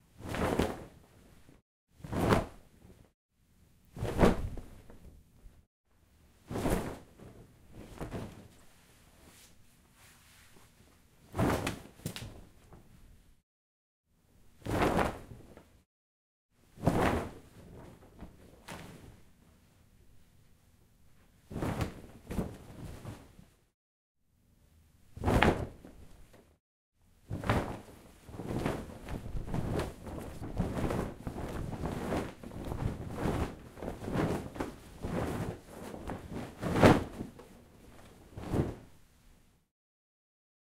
Various cloth flaps or flumpfs. Foley sound of a cotton bedsheet rapidly lifted into the air. Originally recorded as a parachute opening sound.
cloth, fabric, flap, flumpf